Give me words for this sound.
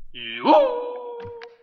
Recording of my voice attempting a Japanese Kabuki noh cry. recorded in Audacity with slight reverb and simulating ohkawa clicking sticks, created because I've noticed theres very few resources for these sounds, unusual vocal sound that may be used as you wish.
ancient, effect, festival, feudal, fx, horror, Japan, Japanese, Kabuki, ninja, noh, samurai, sound, stage